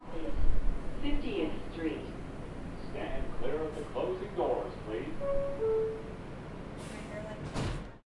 NYC Subway train. PA voice "This is 50th st, stand clear of the closing doors, door close
NYC_Subway train. PA voice "This is 50th st, stand clear of the closing doors, door close
subway, st, close, 50th, closing, PA, train, voice, nyc, door, doors